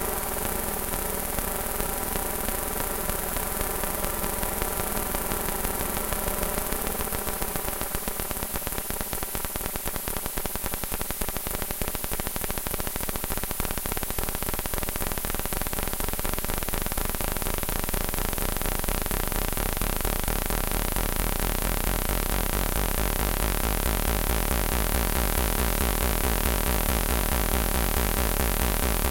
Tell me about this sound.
VCS3 Sound 8
Sounds made with the legendary VCS3 synthesizer in the Lindblad Studio at Gothenborg Academy of Music and Drama, 2011.11.06.
Spring-Reverb, Analog-Synth, VCS3, Analog-Noise, Modular-Synth